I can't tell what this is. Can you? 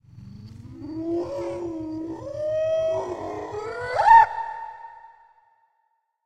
Processed Exuberant Yelp Howl 5
A time-stretched and reversed version of the Expressive Moan in my Sled Dogs in Colorado sound pack. The original sound file was the happy cry of an Alaskan Malamute. Recorded on a Zoom H2 and processed in Peak Pro 7.